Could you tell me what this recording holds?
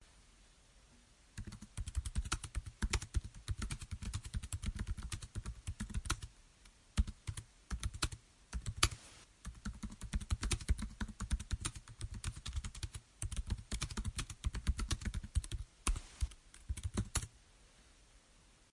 Laptop typing
typing on my laptop